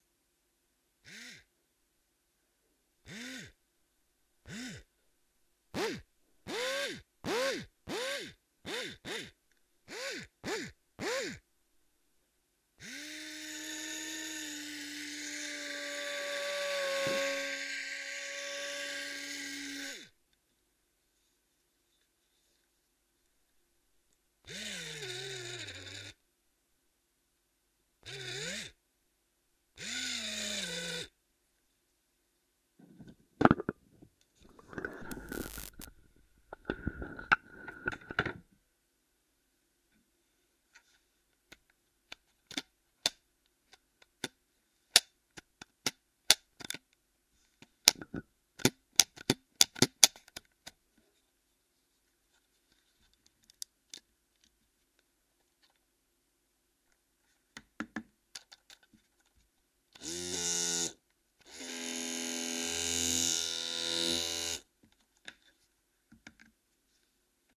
Playing with an electromotor close to the mic